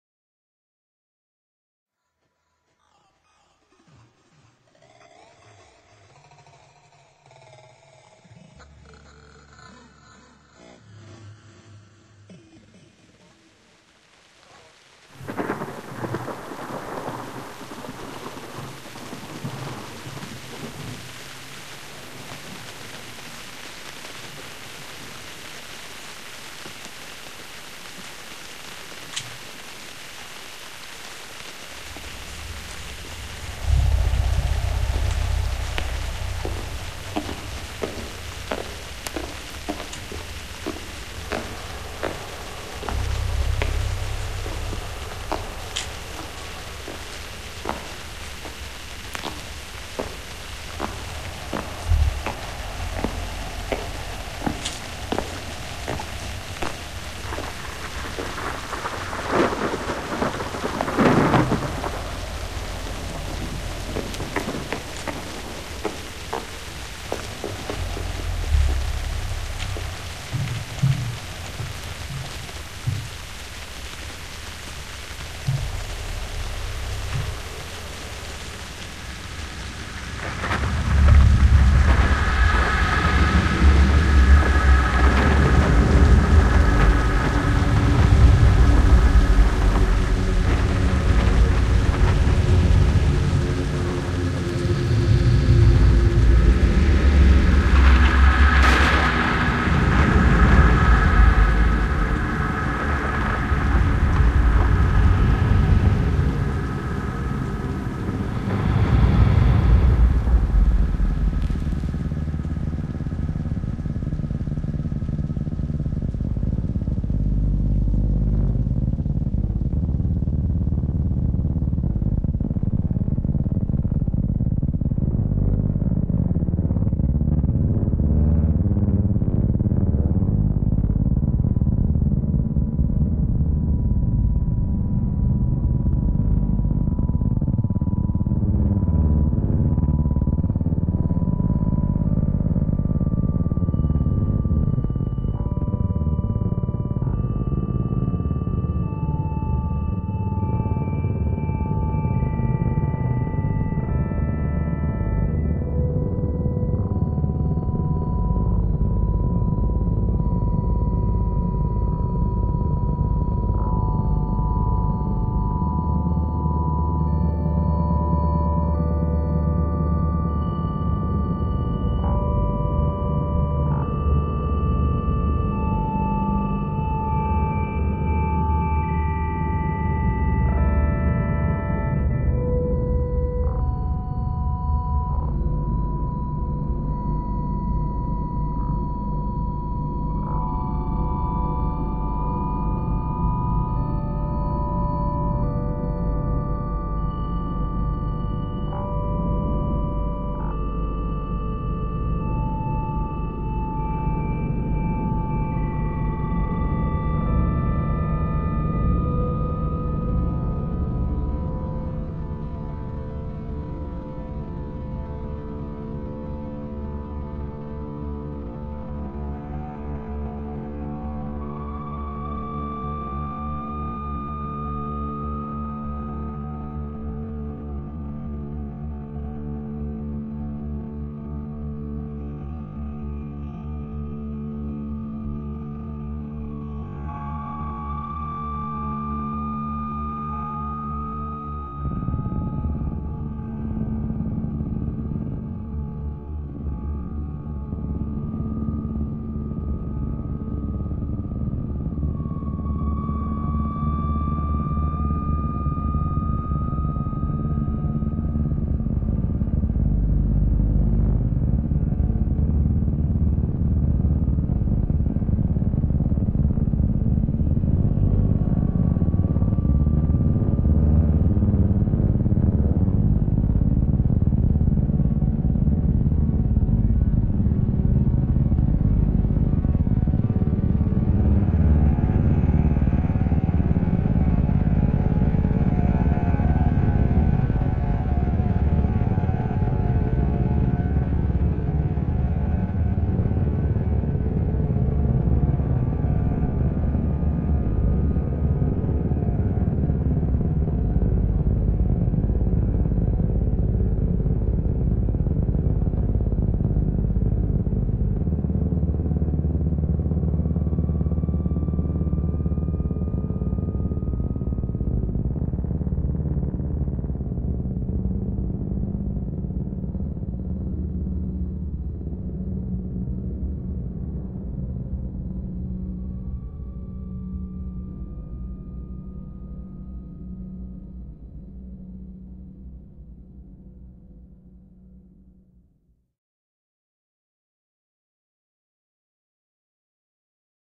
Session leftover, noise collage from filed recordings and studio.